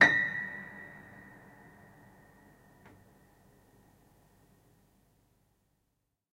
Recording of a Gerard-Adam piano, which hasn't been tuned in at least 50 years! The sustained sound is very nice though to use in layered compositions and especially when played for example partly or backwards.Also very nice to build your own detuned piano sampler. NOTICE that for example Gis means G-sharp also kwown as G#.